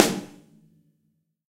BRZ SNARE 002 - WOH
This sample pack contains real snare drum samples, each of which has two versions. The NOH ("No Overheads") mono version is just the close mics with processing and sometimes plugins. The WOH ("With Overheads") versions add the overhead mics of the kit to this.
These samples were recorded in the studio by five different drummers using several different snare drums in three different tracking rooms. The close mics are mostly a combination of Josephson e22S and Shure SM57 although Sennheiser MD421s, Beyer Dynamic M201s and Audio Technica ATM-250s were also used. Preamps were mainly NPNG and API although Neve, Amek and Millennia Media were also used. Compression was mostly Symetrix 501 and ART Levelar although Drawmer and Focusrite were also used. The overhead mics were mostly Lawson FET47s although Neumann TLM103s, AKG C414s and a C426B were also used.
room, real, live, close, drum, stereo, space, snare, overheads